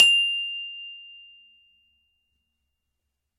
Samples of the small Glockenspiel I started out on as a child.
Have fun!
Recorded with a Zoom H5 and a Rode NT2000.
Edited in Audacity and ocenaudio.
It's always nice to hear what projects you use these sounds for.
campanelli, Glockenspiel, metal, metallophone, multi-sample, multisample, note, one-shot, percussion, recording, sample, sample-pack, single-note